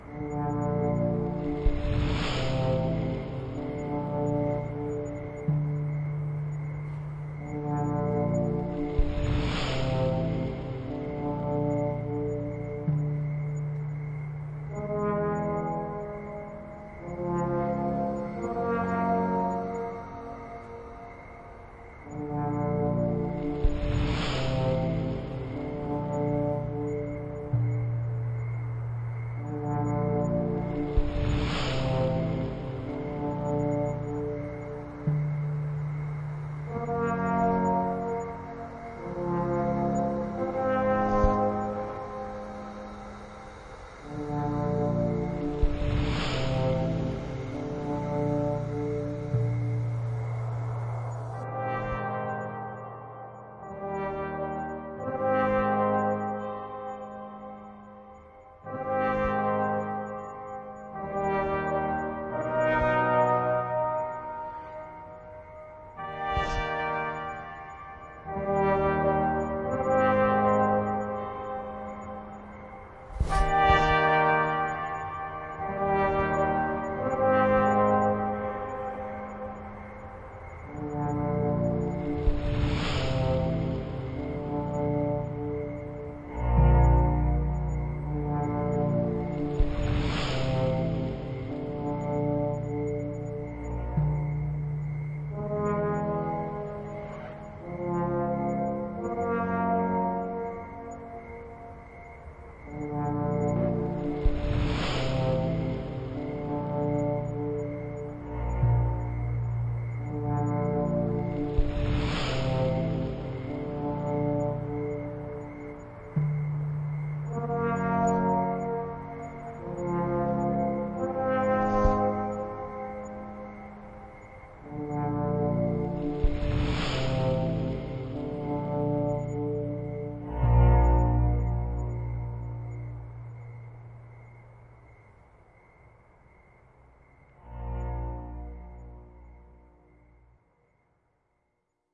Beverages Explained
It samples String Quartet No. 12 in F Major, Op.
130bpm, ambient, background, background-sound, brass, breath, cinema, cinematic, dramatic, dreamy, ensemble, film, horns, loop, movie, night, orchestral, soundscape, strings